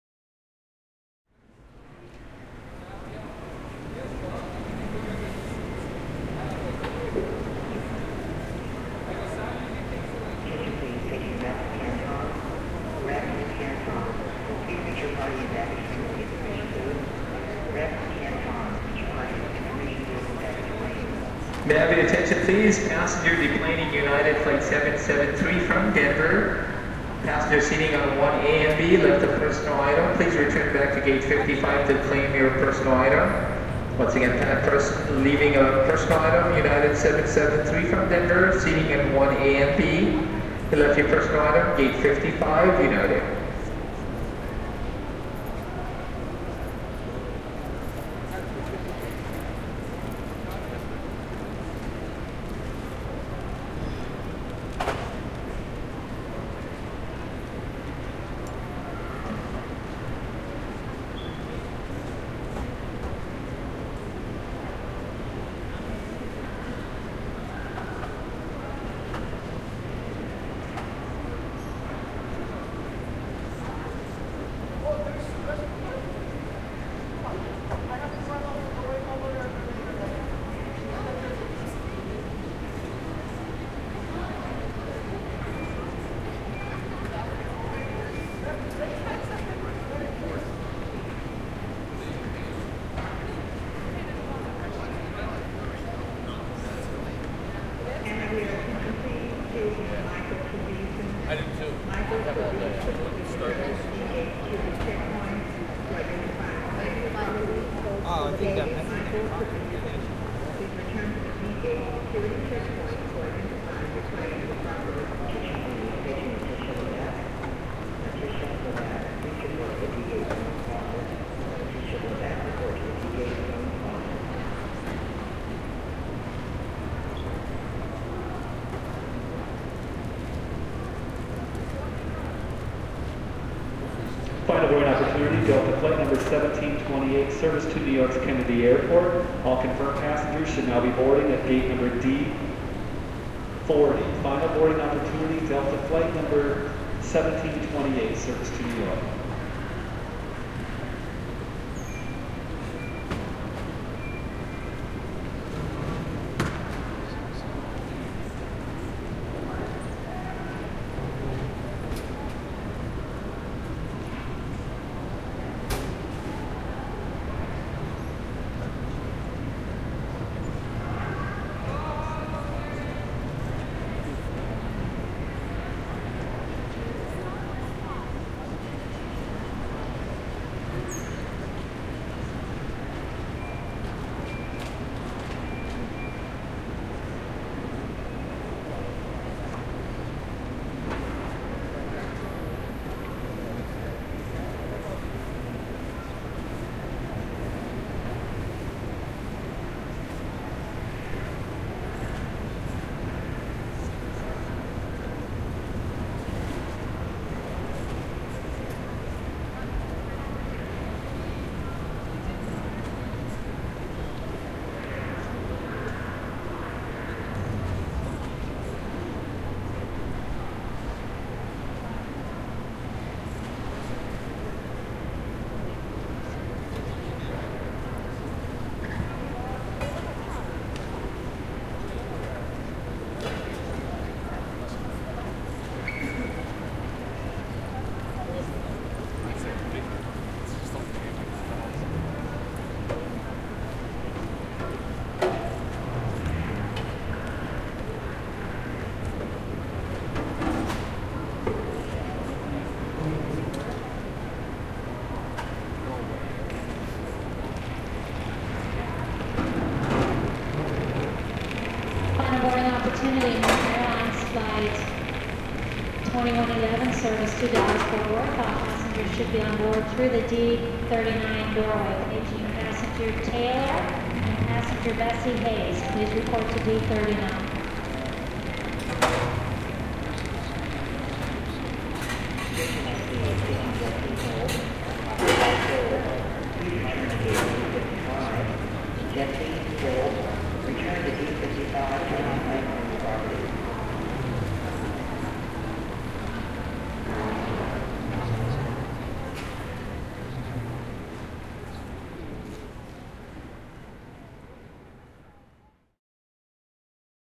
Airport Ambiance sounds including chatter, walking, and general airport announcements. Recorded at McCarran International Airport, Las Vegas, Nevada, United States. Record Date: 15-MAY-2010
airlines, airport, ambiance, american, announcement, atmosphere, call, calls, chatter, clanging, cleaning, craft, dishes, echo, field-recording, flight, footsteps, international, las, mccarran, messages, nevada, noise, sounds, talking, vegas, voices, walking